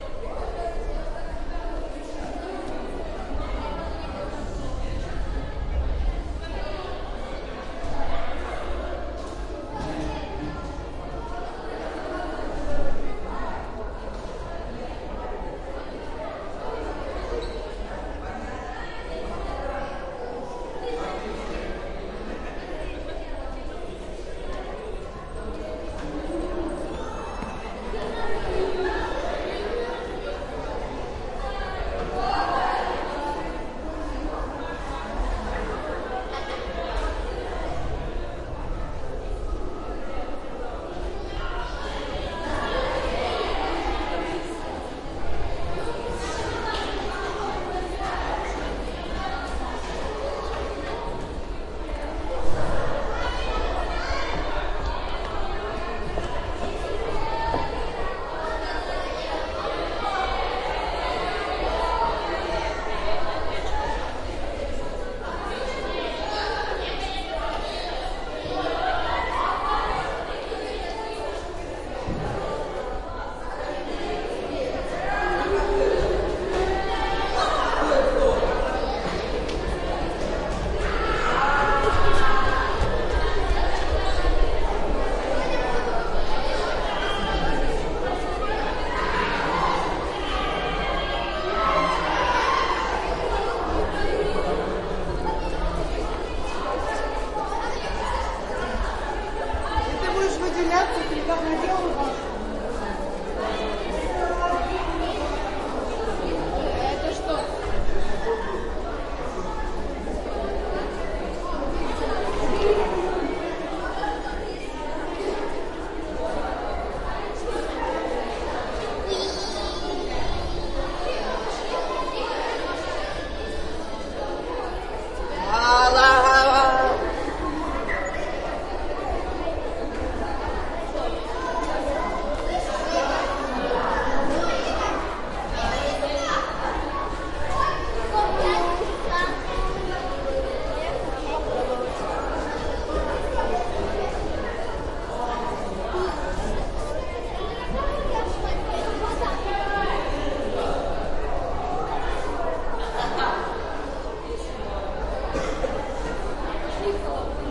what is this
School break
The break in midle school. Equable noize, but with teacher's voice in one moment.
kids, shouting, school-yard, child, children, kid, school, ambient